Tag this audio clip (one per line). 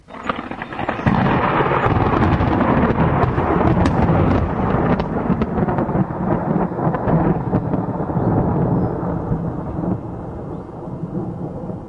short
thunder